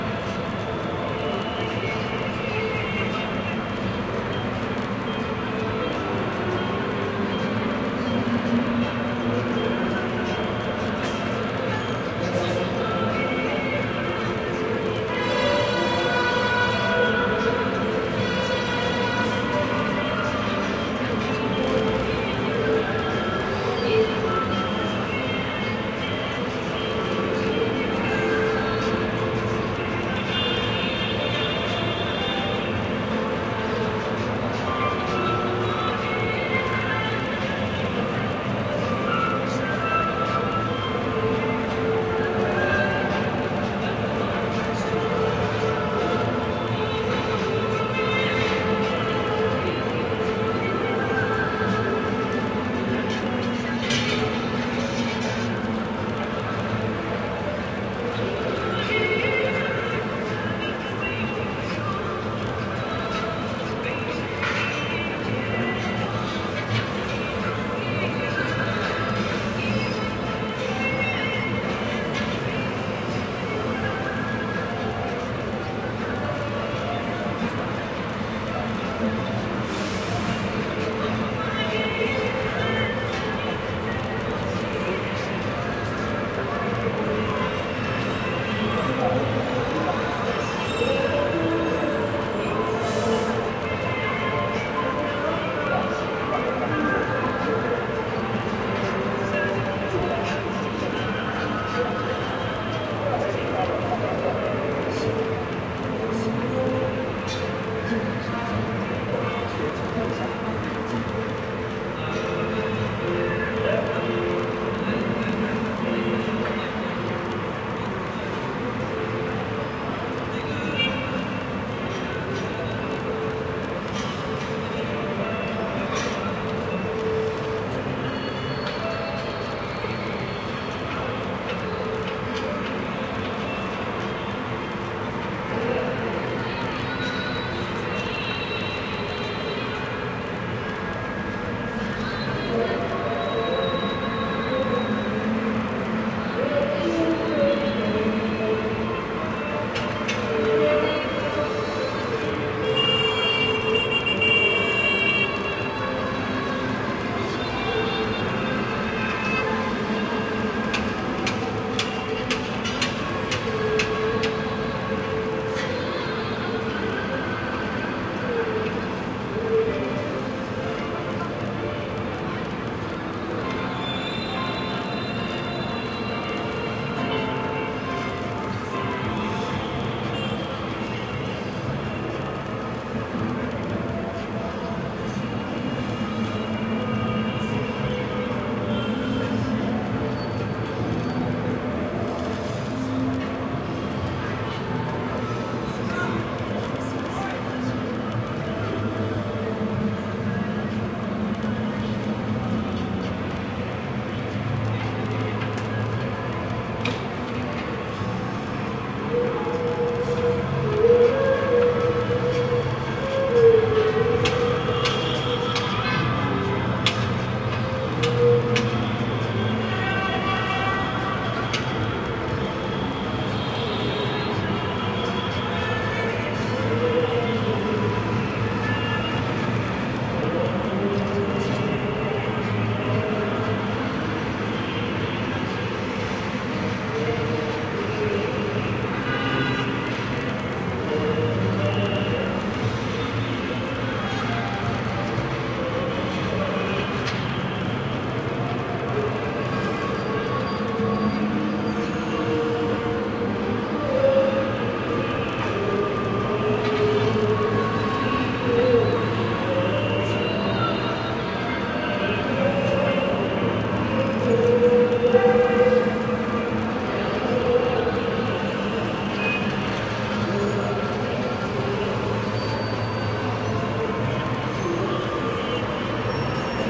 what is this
Century Square, Shanghai at Night
Field recording of late night music from bars in Century Square, Shanghai. Recorded on a Cannon D550.
music, urban, horn, taxi, city, traffic, field-recording, party, china